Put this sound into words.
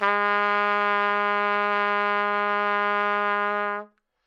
trumpet-gsharp3
Part of the Good-sounds dataset of monophonic instrumental sounds.
sample, single-note, trumpet